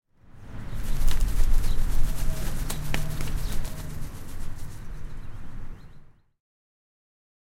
je birdstakeflight
Small flock of birds taking flight, created from several samples with delay filters
wings, flight, birds, flock